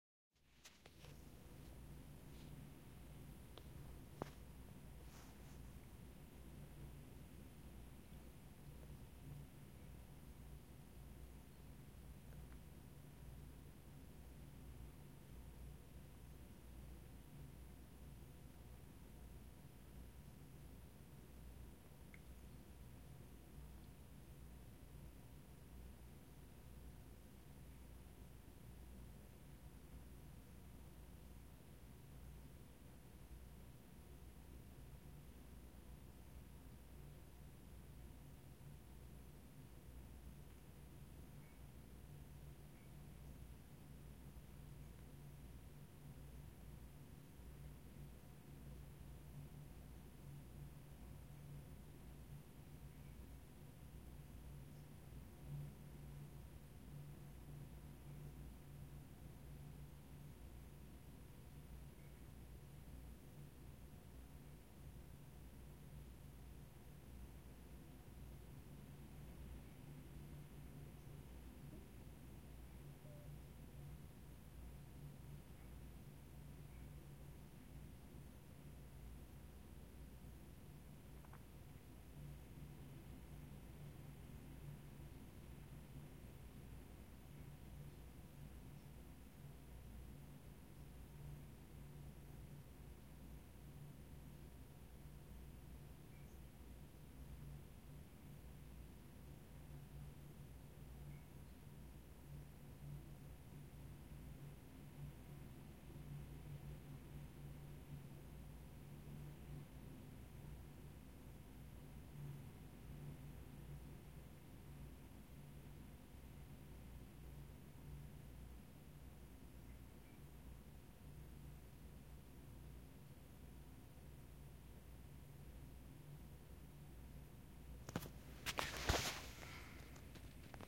Simple room tone